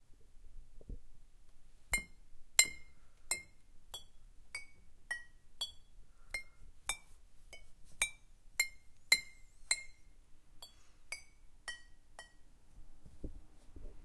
Glass bottles clinking at different tones